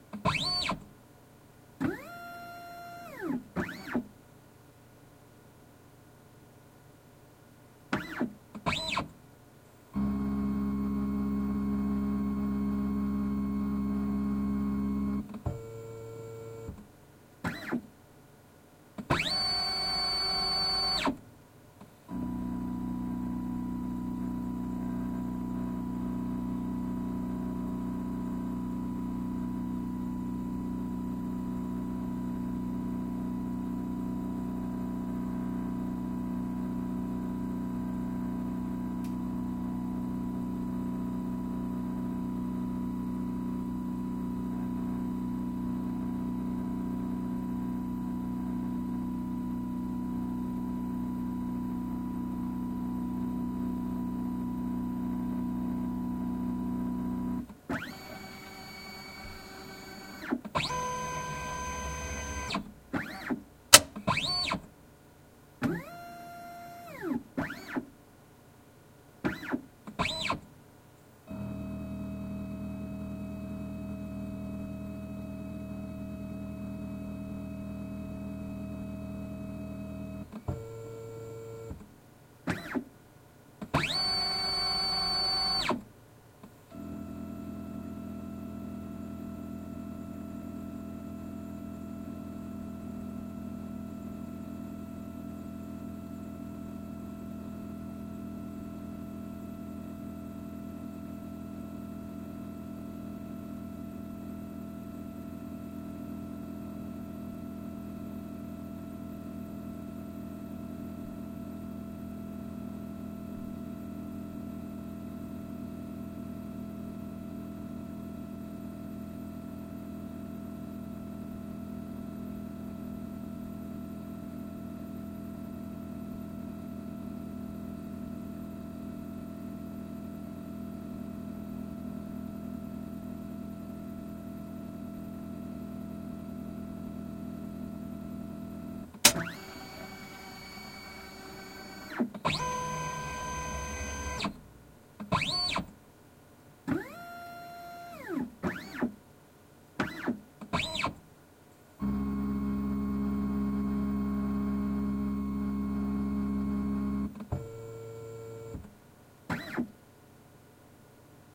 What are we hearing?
scanner epson V600
Recording of a busy working Epson V600 scanning a few 35mm film negatives. Lot's of adjusting sounds and transportation of the scanhead as the operation continues.
beep, beeping, belt, buzz, ccdarray, computer, digital, electromotor, electronic, filmscan, glitch, hardware, negativescan, noise, photoscan, scan, stabilizerbar, steppermotor